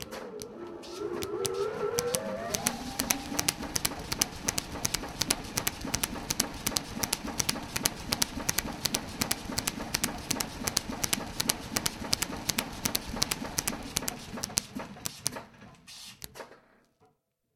Power hammer - Billeter Klunz 50kg - Flat belt drive full cycle
Billeter Klunz 50kg flat belt drive full cycle.